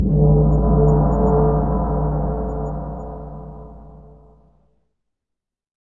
gong -4 sem
Based on my BOSS gong, lowered 4 semitones.
percussive, impersonhood, anti-Shinto, drum, metallic, single-impact, hit, metal, gamescore, crash, percussion, Leonard-Susskind, industrial, anti-Buddhism, gong-sound, atheism, gong, filmscore, foley, synth, impact, gowlermusic